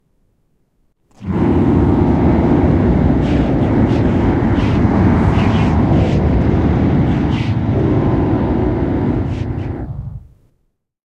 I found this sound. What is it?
Cyborg Swarm
A crowd of cyborg communicating at the same time. It might be overwhelming.